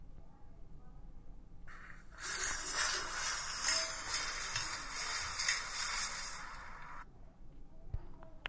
Sonic Snaps GEMSEtoy 7
home, snaps, sonic, sounds, Switzerland